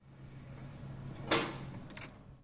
sound 14 - fountain
Sound of a water-point (fountain).
Taken with a Zoom H recorder, near fountain.
Taken in the computer rooms building (Tallers).
Tallers-UPF, campus-upf, liquid, UPF-CS14, drink, water, fountain